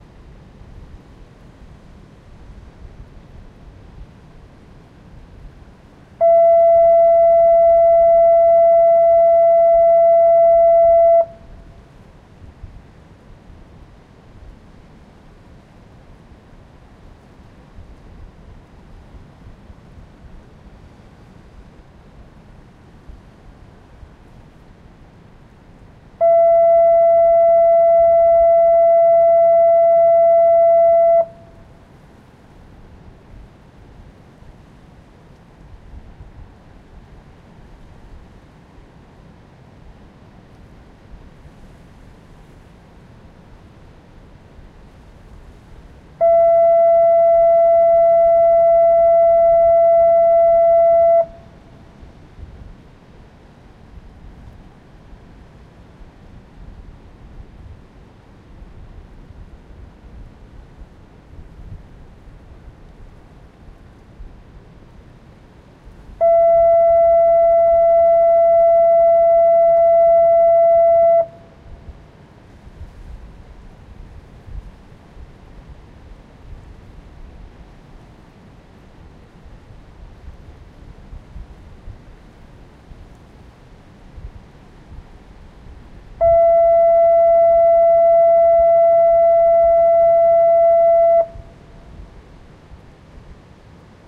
Fog Signal, recorded with Zoom H1.